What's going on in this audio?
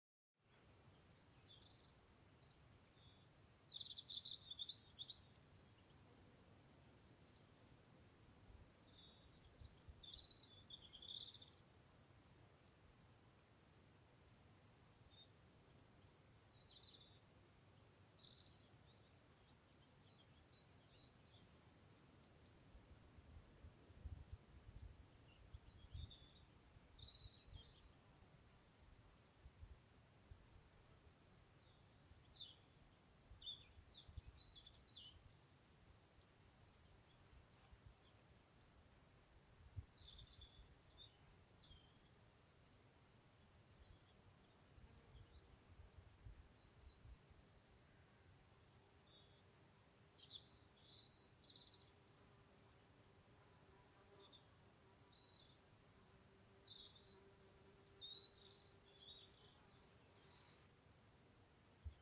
A lonely Robin sings accompanied by the buzz of a bee.